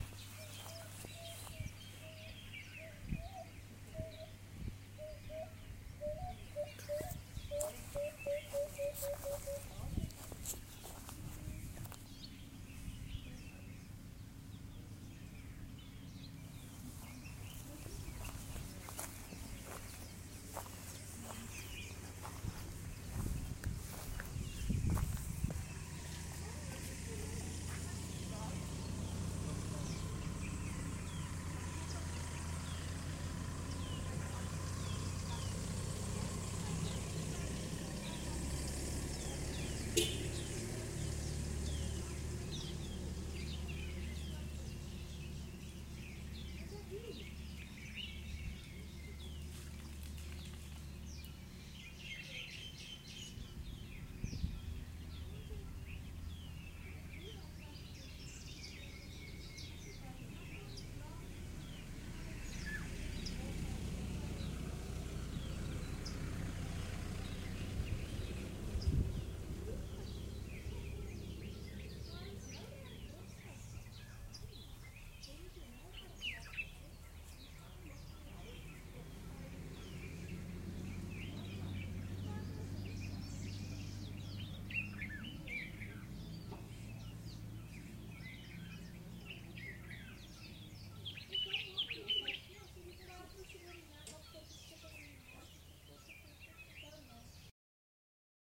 Ambiance sound of the area around a farm house.